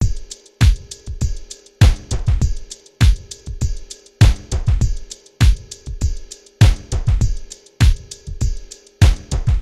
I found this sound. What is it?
hot drop
Chiled out little loop constructed with Sonar and NI Battery.